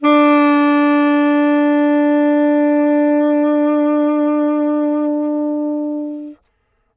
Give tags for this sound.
sax
sampled-instruments
jazz
saxophone
woodwind
tenor-sax
vst

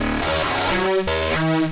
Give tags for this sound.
bit
guitar

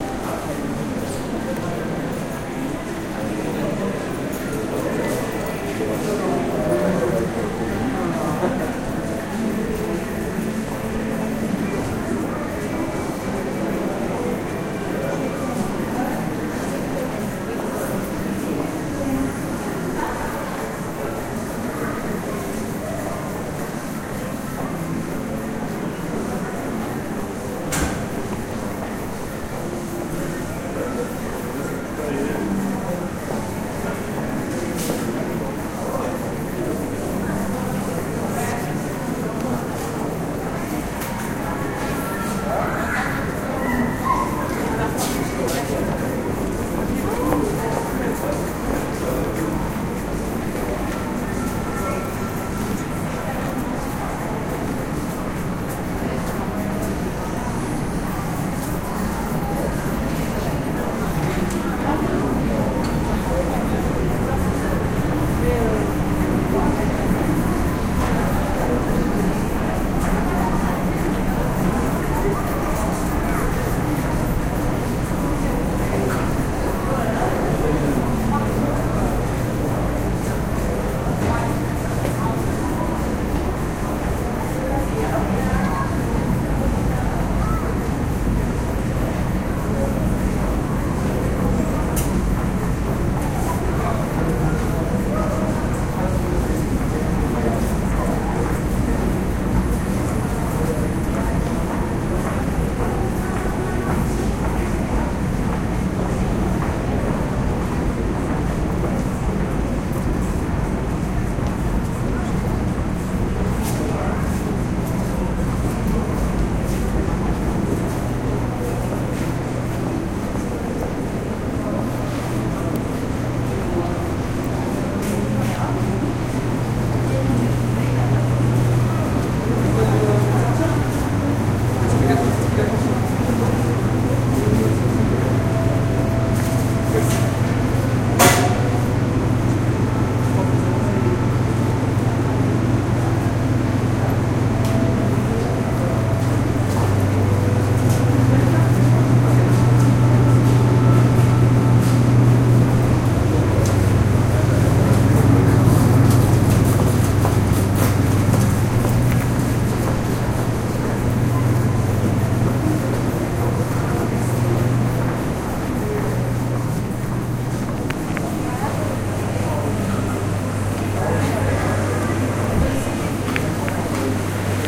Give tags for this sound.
center
Commercial
peoples
shops
tour
walk

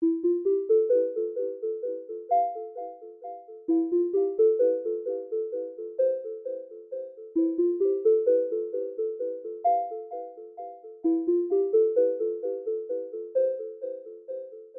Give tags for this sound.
soft
chill
ambient
Synth

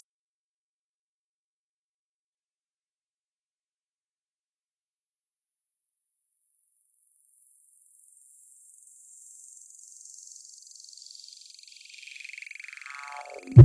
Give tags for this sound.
feedback
delay
sound-effect
high-pitched
sfx
modulation

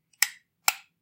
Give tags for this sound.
Light
Button
Switch

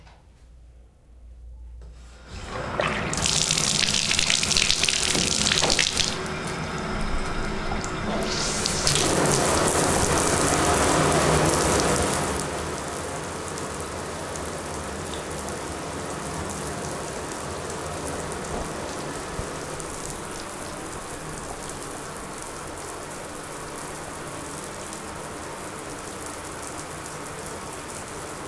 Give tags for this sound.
room
shower
bath

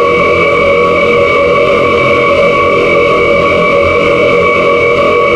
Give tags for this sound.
engine
film
horror
industrial
noise
sci-fi

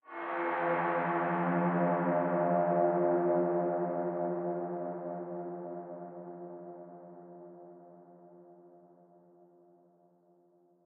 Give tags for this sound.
sweep
creepy
horn
dark
brass

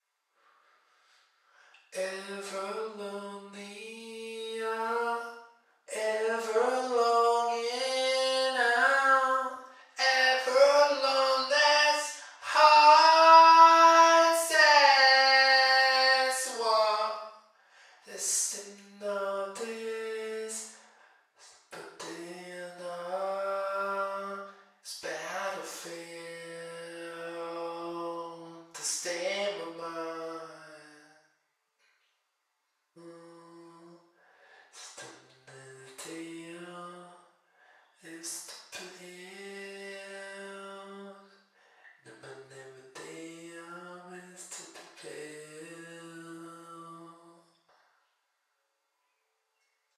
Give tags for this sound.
acapella
vocals
singing

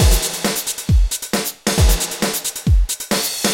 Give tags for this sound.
135
beat
bpm
drumloop
loop
Maschine

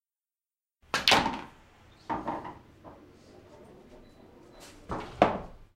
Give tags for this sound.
balls
pool
shot

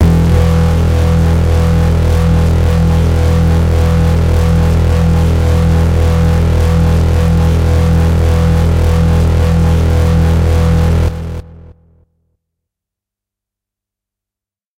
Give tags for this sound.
hard
harsh
multi-sample
synth
lead
waldorf
electronic

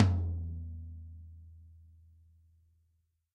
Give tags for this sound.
drum
kit
tom
metal

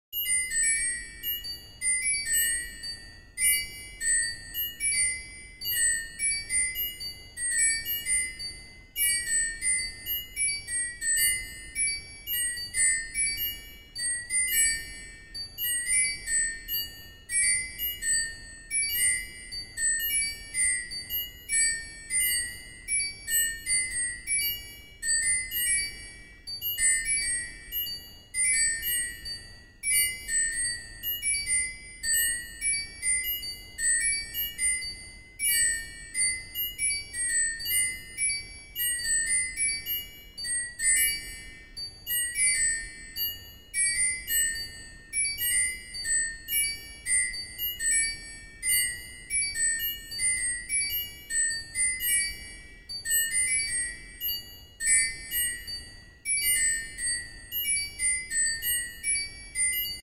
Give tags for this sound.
loop
ringing
chime
windchime
tablespoon
math
bell
MTC500-M002-s14
ring
ding

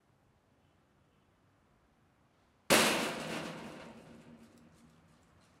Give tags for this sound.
trueno2
trueno3

